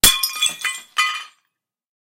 Plate Break 3
A plate that is dropped and broken.